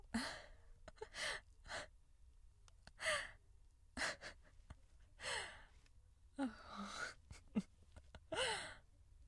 woman having a long sensual laugh
woman having a long laugh very close, a tiny bit of a sensual sound to it.
female, funny, giggle, girl, happiness, humor, jolly, joy, laugh, laughter, sensual, smile, woman, women